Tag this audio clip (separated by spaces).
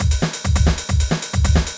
beat bpm drumloop loop Maschine 135